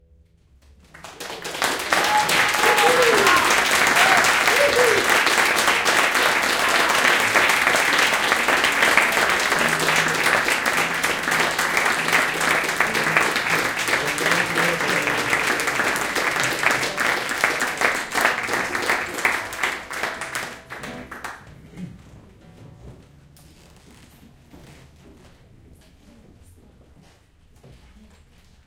241213 - Rijeka - Blumlein - Frano Živković 2
Applause during guitar concert of Frano Živković in Filodrammatica, Rijeka.
Recorded in Blumlein (2 x AKG 414XLS, figure of 8)